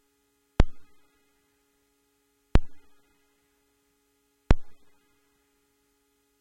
ARP 2600 Reverb
Samples recorded from an ARP 2600 synth.
More Infos: